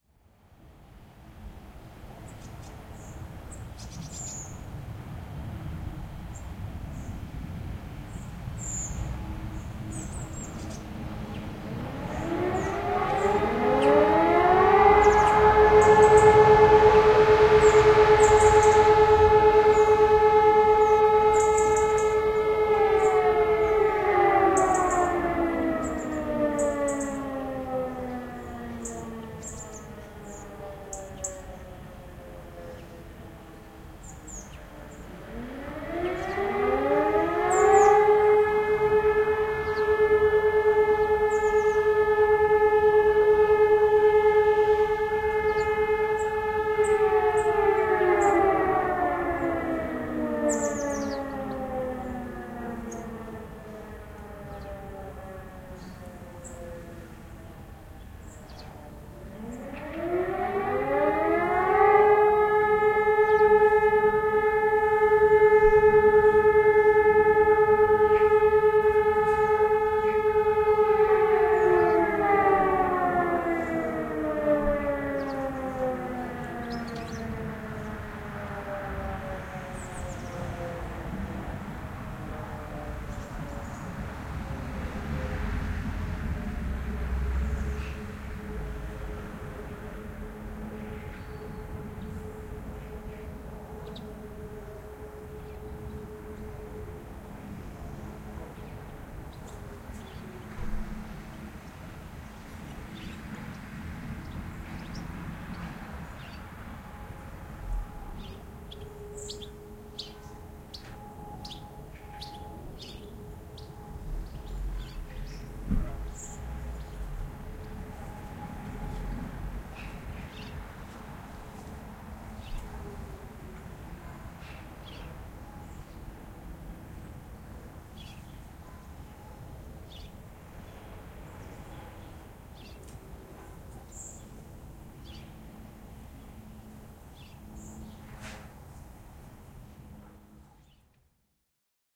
The monthly test of the fire siren in my home village recorded with Rode M5 matched pair in ORTF. Very heavily processed, background noise suppression, siren isolated. For comparison please listen to the unedited version.